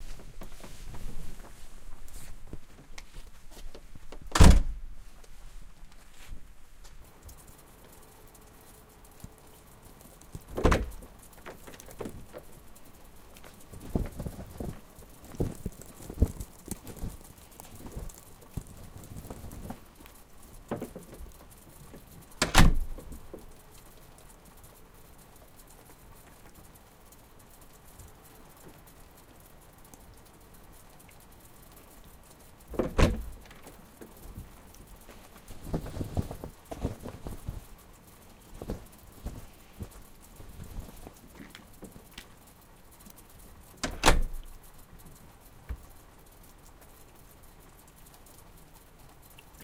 Opening and closing a door, and shaking of mats. Dripping and snow in the background.
Cut out of the recording "snow and dripping" and can easily be integrated on top of that.
Recorded on a Zoom H6, with XY mic (electret) at 90 degrees, fake fur on the mike and a plastic bag on the recorder.